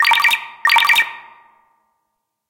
Ringing ringtone
Created with a sound i made but used DirectWave from FL studio to change it into a sci-fi sounding ringtone. Recorded From FL Studio 20 to Audacity, Processed in Audacity.
Ring, high-pitch, quick, effect, sound, sci-fi, ringing, effects, rings, reverb, ringtones, ringtone, fx